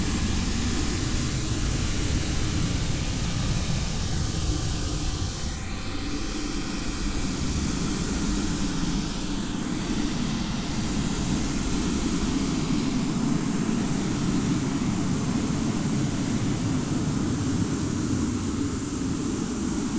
A snooshing space noise made with either coagula or the other freeware image synth I have.
synth, image, noise, space